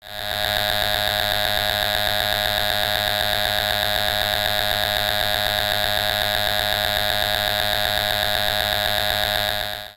Rapid buzzes. Made on an Alesis Micron.
buzz, alesis